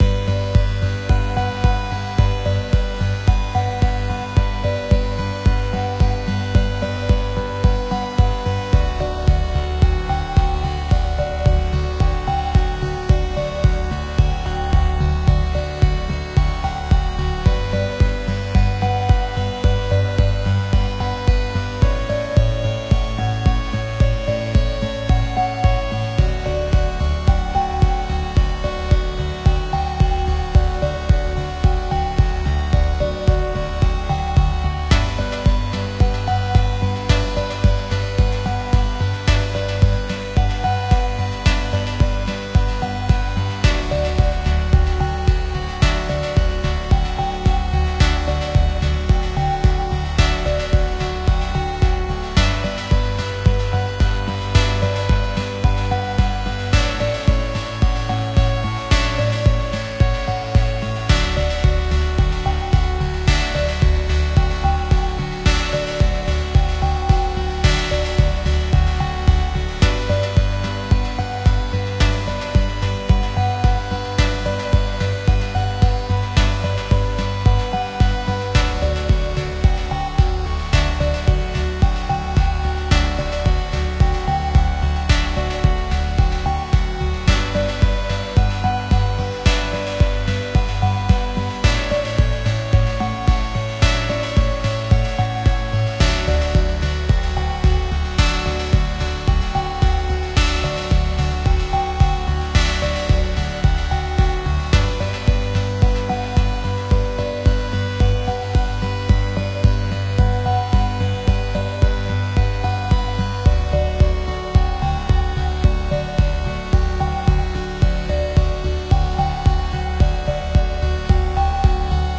Electropop Base Loop.
Edited in abletonlive,Massive And Sylenth1 synths.